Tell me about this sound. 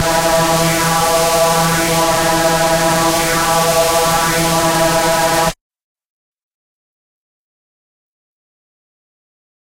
multisampled Reese made with Massive+Cyanphase Vdist+various other stuff
distorted, reese, hard, processed